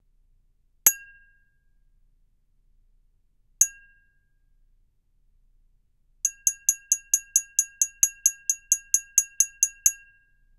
GLASS STRIKES 2
-Glass clanking and striking
clank, clanking, clanks, cup, glass, hit, hits, hitting, mug, strike, strikes, striking